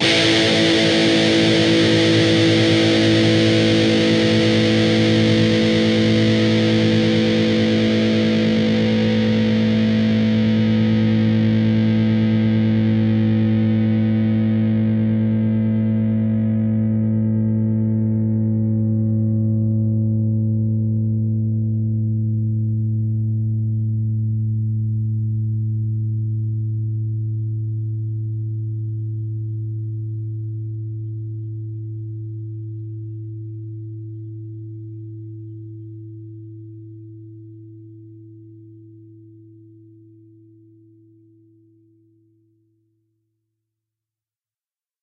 Dist Chr A5th
Standard A 5th chord. A (5th) string open, D (4th) string 2nd fret, G (3rd) string, 2nd fret. Down strum.
distorted-guitar, rhythm-guitar, chords, distorted, rhythm, guitar, guitar-chords, distortion